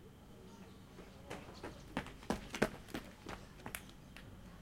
runner passing by
field-recording, footsteps, running, run-passing